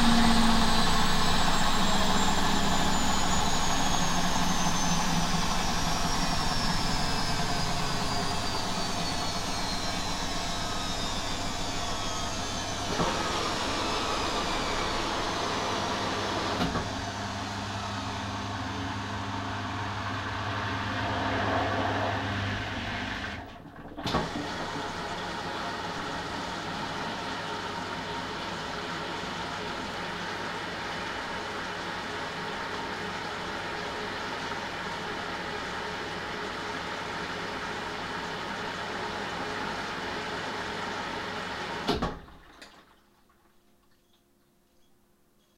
washing machine cycle end and empty water
Washing machine cycle ending and machine emptying water.
end, whirring, empty, water, machine, cycle, washing